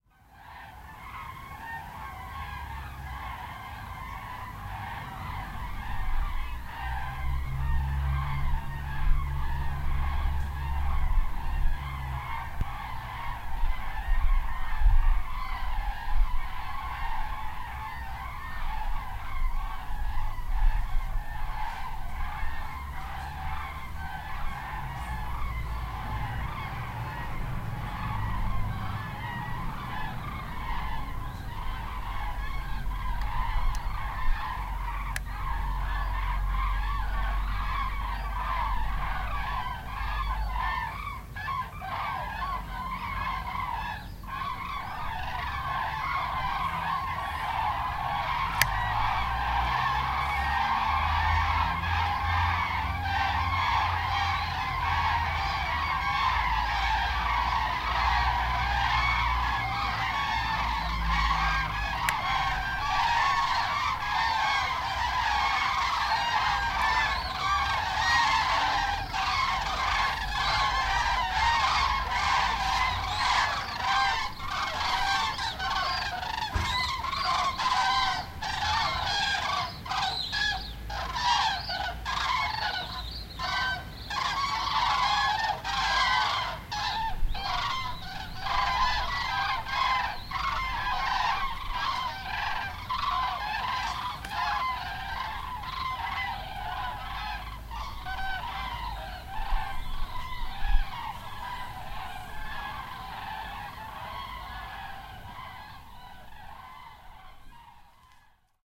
A swarm of geese over my house, recorded with a tascam dr-07 mkII and processed with voxengo's voxformer.